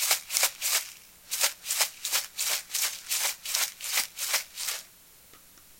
The sound of dry seasoning being shaken in a plastic bottle.